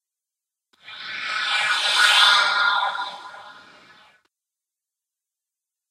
speeder flyby
Made with me blowing into a pvc pipe.